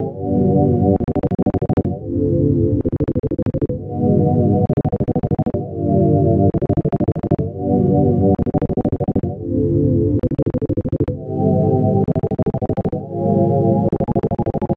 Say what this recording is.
Lov37Crab
Sutter love sound I made using fruity loops
gate, love, trance